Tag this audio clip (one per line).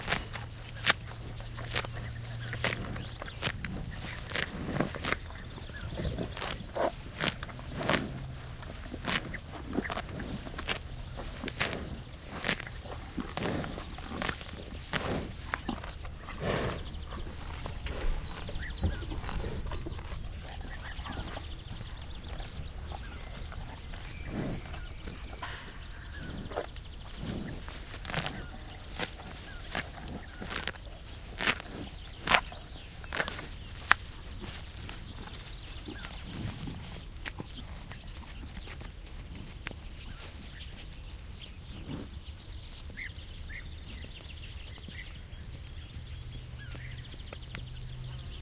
grass
nature
south-spain
pasture
field-recording